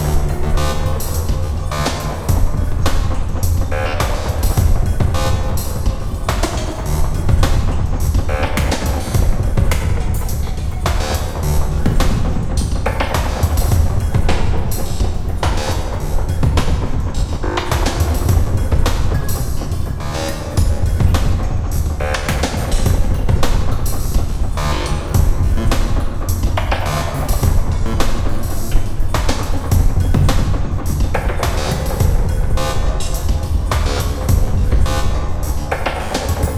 Sci-Fi Groove - 01
Loop (105 bpm) with a dark sci-fi sound created with Spectrasonics Stylus RMX. Recorded in Ableton Live 8.2.1.